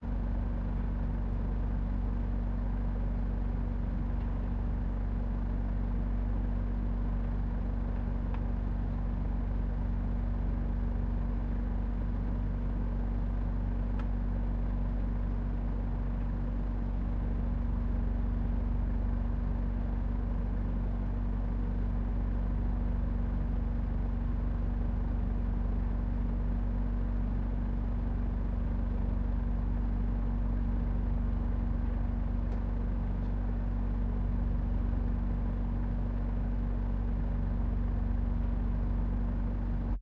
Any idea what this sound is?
Parking car with running engine
down pitched Microwave Sound